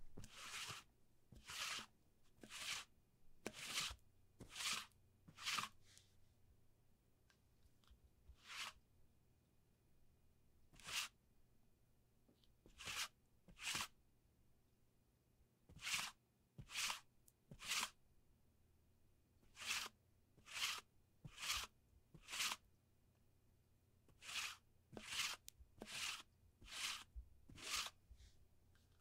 Rolling a sticky tape lint roller on upholstery.